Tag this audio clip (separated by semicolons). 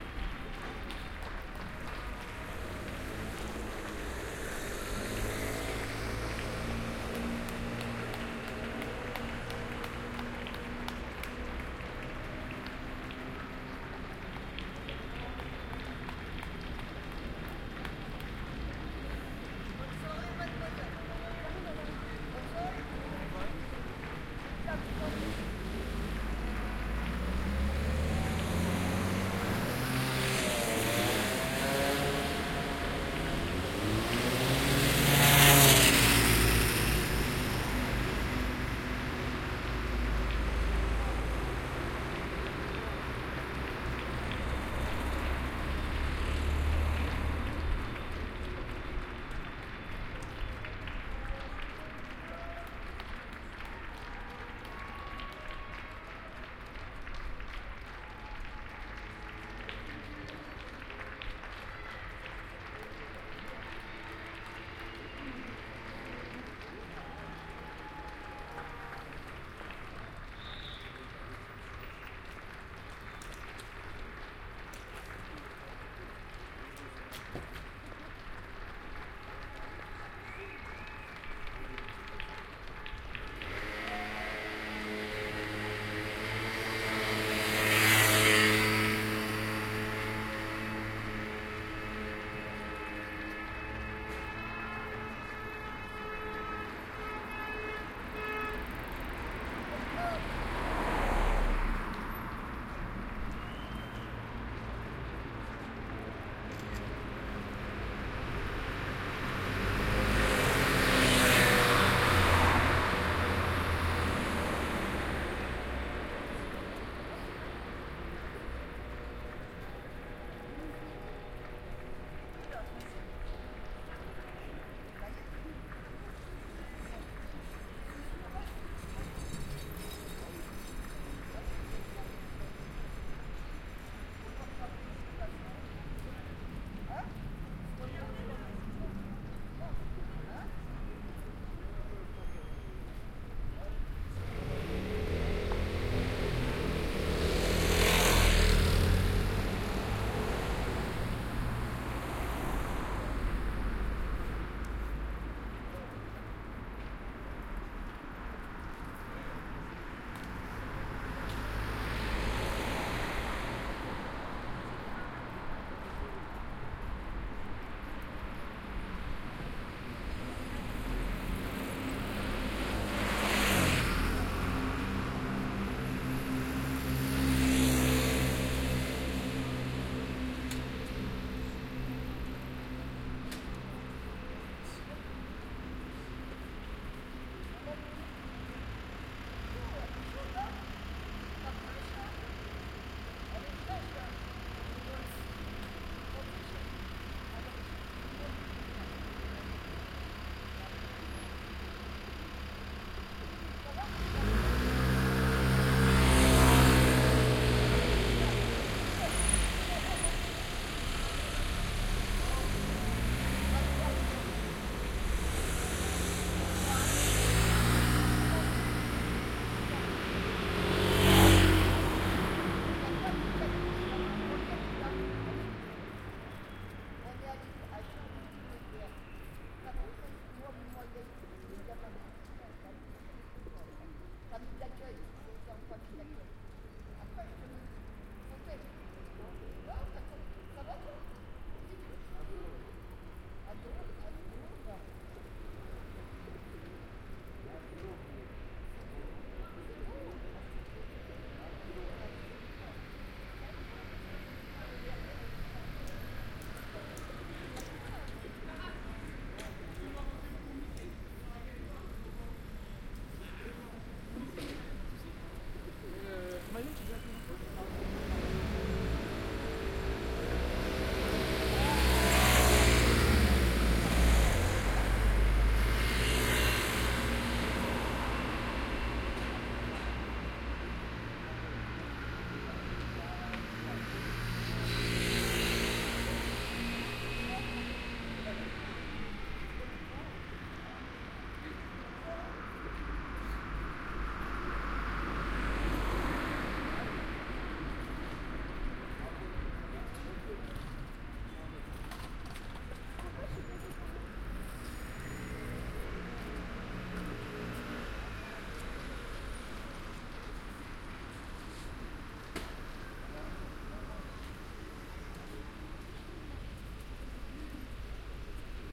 ambience; ambient; atmosphere; binaural; city; confinement; ConfinementSoundscape; corona; covid19; empty-spaces; field-recording; noise; paris; people; soundscape; street; traffic